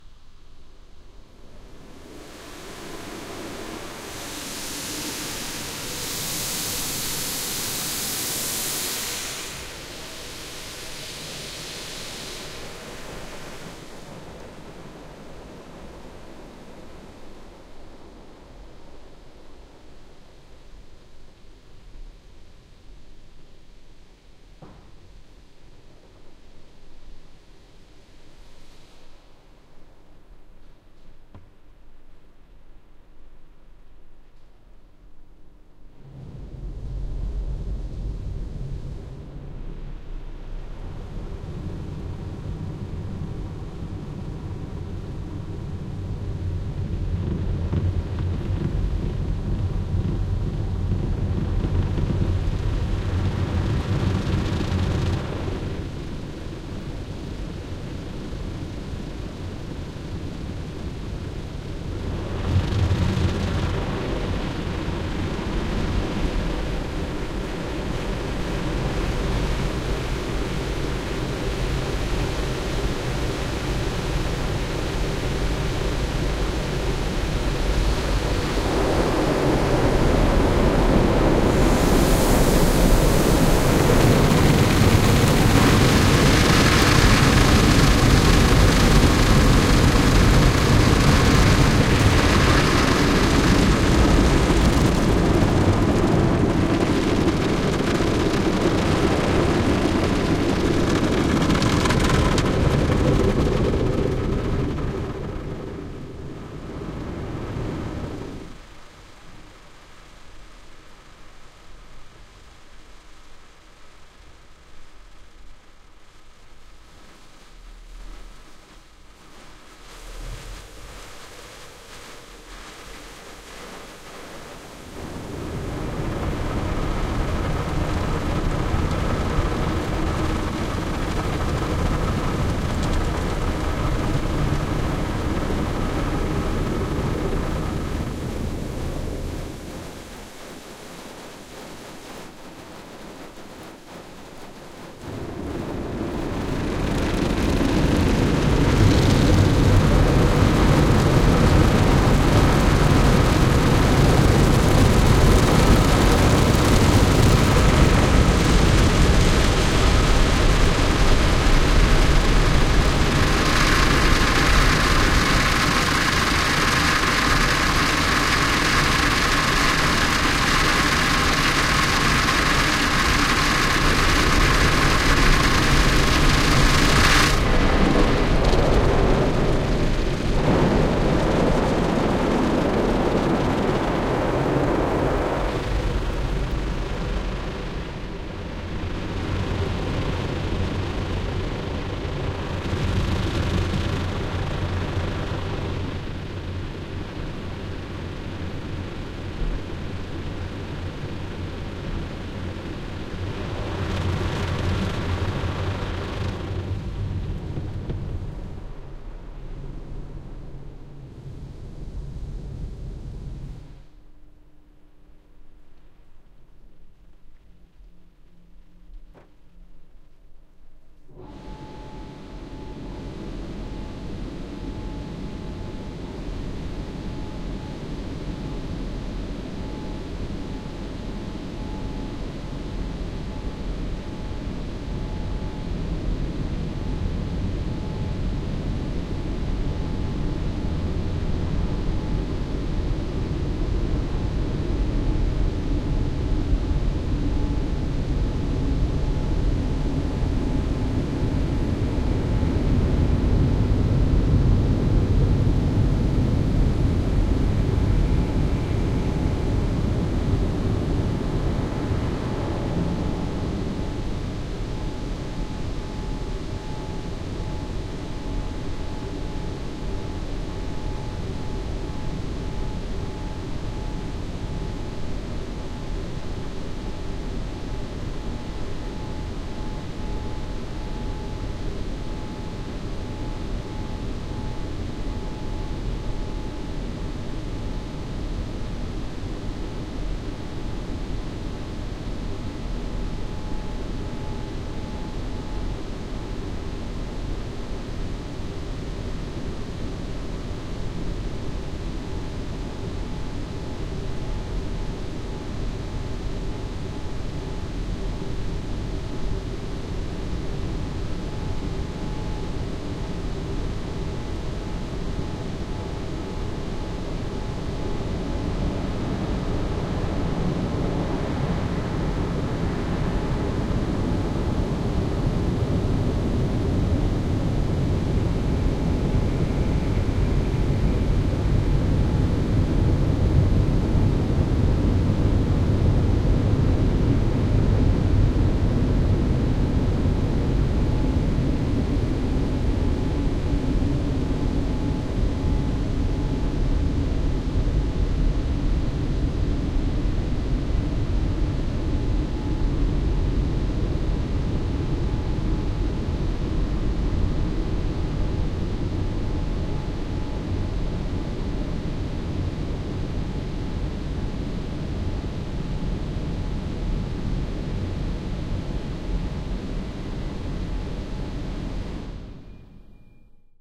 Recorded using two RODE NT1-As, spaced out by about half a meter, pointing at opposite windows.